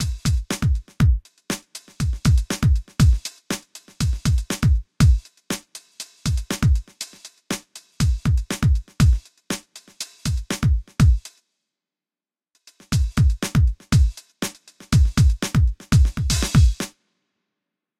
A collection of sounds created with Electribe MX1 in Vemberaudio Shortcircuit, some processing to get Toms & Hats, and a master multiband limiter to avoid peaks.
Col.leció de sons creats amb una Electribe MX1 samplejats i mapejats en Vemberaudio Shortcircuit, on han sigut processats per obtenir Toms, Hats i altres sons que no caben dins dels 9. Per evitar pics de nivell s'ha aplicat un compressor multibanda suau i s'ha afegit una lleugera reverb (Jb Omniverb) per suavitzar altres sons.
Enjoy these sounds and please tell me if you like them.
Disfrutad usando éstos sonidos, si os gustan me gustará saberlo.
Disfruteu fent servir aquests sons, si us agraden m'agradarà saber-ho.